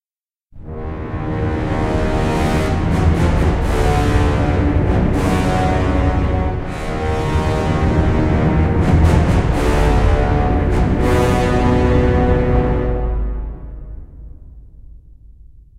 evil villian theme
Music for a villian theme entrance, made in my DAW with some orchestral libraries, the instruments are: Horns, cimbasso, string sections, trombones, tubas.
thrill, music, fear, brass, phantom, blockbuster, vader, evil, orchestra, drama, cinematic, suspence, villian, dramatic, film, sinister, movie, powerful, terror